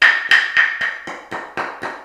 two pieces of wood
impact, wood